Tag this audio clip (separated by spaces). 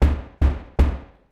door knock police